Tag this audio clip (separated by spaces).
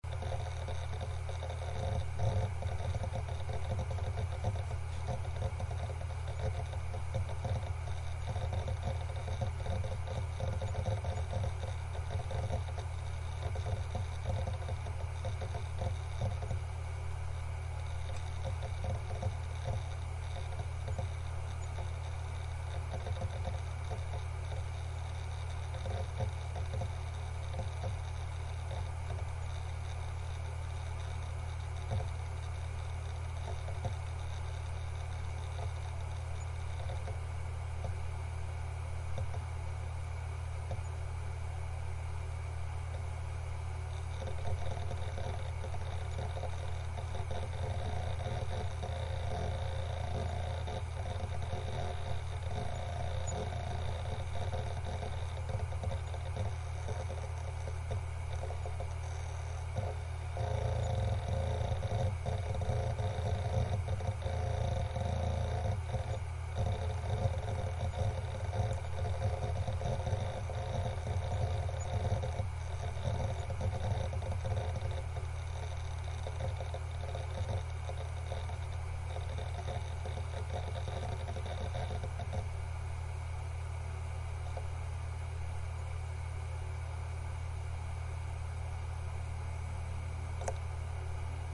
click clicking computer data disk drive hard hard-drive hdd read reading western-digital write writing